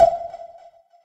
jillys sonar3
Sonar sound made with granulab from a sound from my mangled voices sample pack. Processed with cool edit 96. Shortened file length.
environmental-sounds-research, synthesis, sonar, jillys, granular